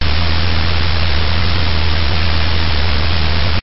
loud noise
drama, ghost, horror, horror-effects, horror-fx, loud, noise, noisy, suspense, terrifying, terror, thrill